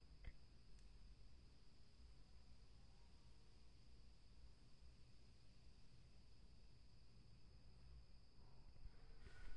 This is the sound of the woods at night in Upstate New York near an urban environment. It is a very quiet sound of peepers and crickets. It was recorded on a tascam DR-40.